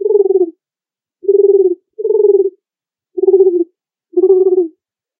Cartoon Doves
Sound of Dove cartoon, ideal for animations or even for more realistic compositions. Recorded with smartphone software, sound effects made with the mouth.
pomba, cartoon, dove